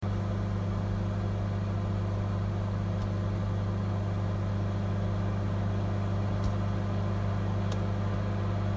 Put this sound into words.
microwave oven hum